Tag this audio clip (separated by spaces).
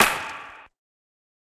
layered clap electronica